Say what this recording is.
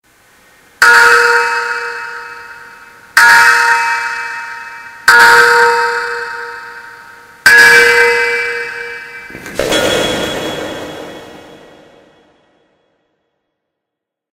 More fun with the 5 gallon glass bottle
ring, bottle, Ting, Glass